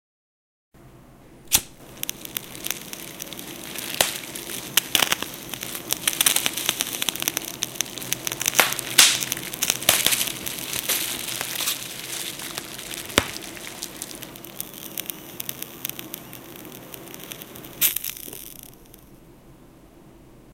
Sound created for the Earth+Wind+Fire+Water contest.
Recording of plastic bags and bubbles, candles, cigarettes and a lighter.
Mainly the sound is made with plastic.
A little reverb finalizes the mix.
It was mastered using a warm compression and equalization.
Fire = Collage of plastic bags, lighter, candles and cigarettes